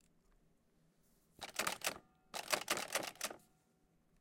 A metal door handle being jiggled frantically.